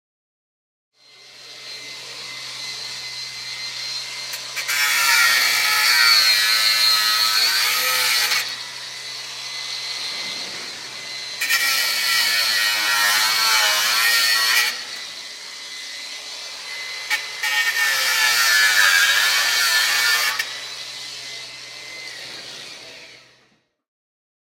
Recorded on Marantz PMD661 with Rode NTG-2.
The sound of an industrial grinder being used on metal.
building, construction, field-recording, industrial, machine, site